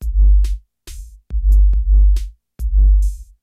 whoomp drums
A one bar 70 BPM beat from an old drum machine. Processed through a Nord Modular.
beat, kick, bass, drums, loop